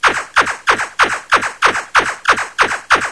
FAZER BLASTS HIGH PITCH WITH ECHO
fazer blast of a space ship high pitch version with echo protecting you in outer space sounds created by Bill by contorting sounds in the twilight zone
space-ship, star